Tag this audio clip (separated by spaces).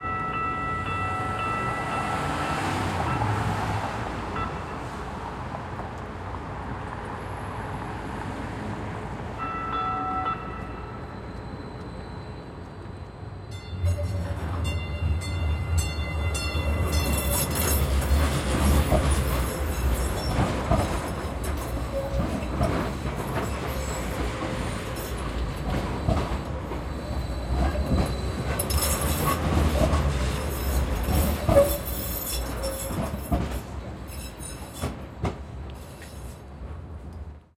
bell city Denver exterior field-recording horn light-rail squeal street train